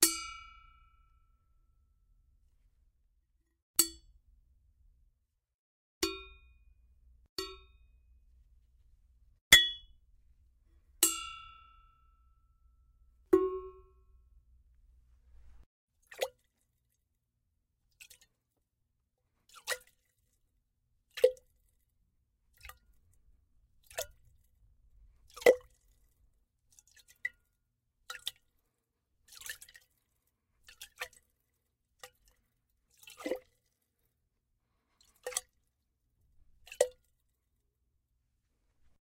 Metal Bottle
The sound of a metal water bottle being tapped and shook both with and without water.
bottle, clang, drips, metal, tapping, water